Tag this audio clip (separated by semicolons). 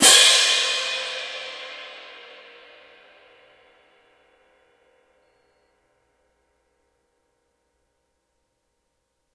concert; crash; crashes; cymbal; cymbals; drum; drums; orchestra; orchestral; percussion; percussive